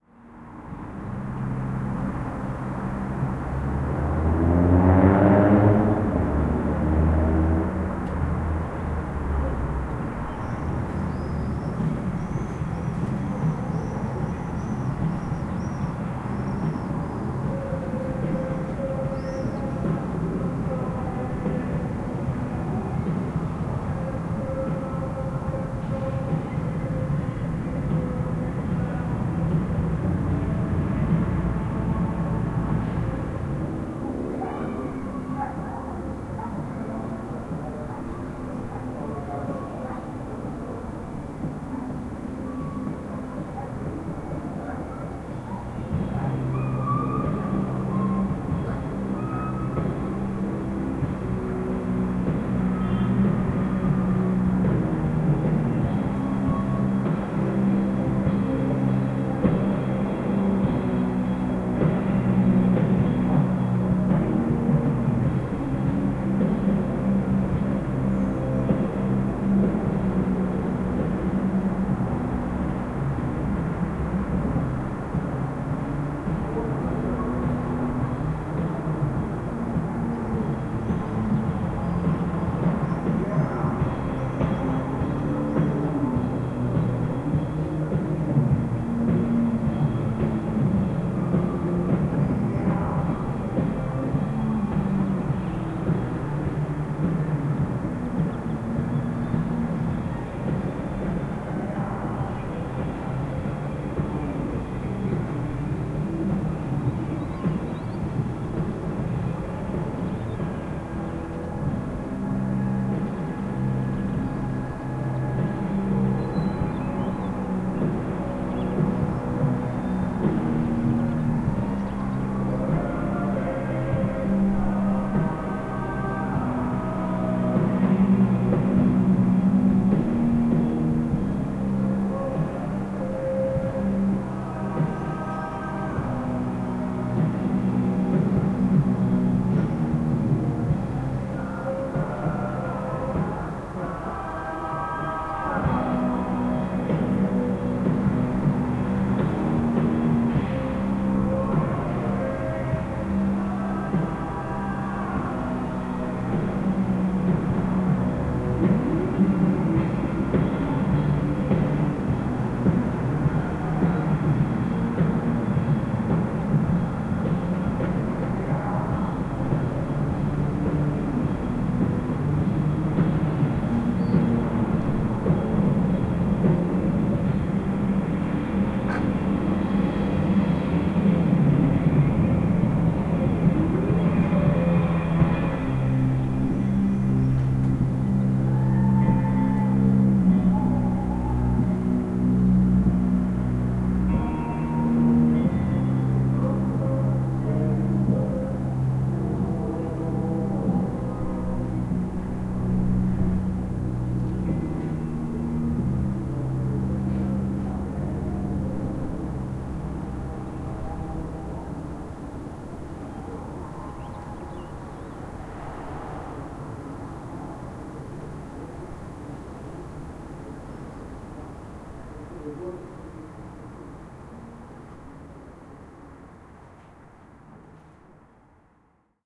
distant concert, traffic, city hum in city center Vilnius Lithuania
Taken from a window, this is a soundscape in Vilnius old town, Lithuania. You can hear a concert happening far away, cars, and general city hum / noise.
far, general-noise, distant-concert, from-far, cityscape, vilnius, background, noise, distant-traffic, ambiance, field-recording, concert, street, city, ambient, live-music, atmosphere, city-hum, distant-live-music, soundscape, background-sound, music, traffic, town-center, ambience, city-noise